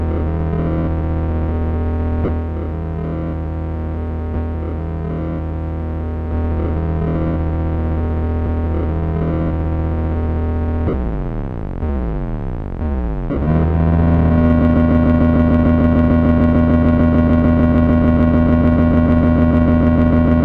Glitch sound from a circuit bent keyboard which eventually decays into a looping noise.